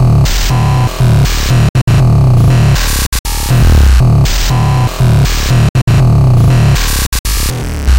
Bend a drumsample of mine!
This is one of my glitch sounds! please tell me what you'll use it for :D
artificial, databending, drum, space, game